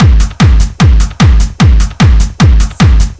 Keep on banging
I used kick 02 by oscillator for the drums and a little percussion to get you going
dance, loop, hard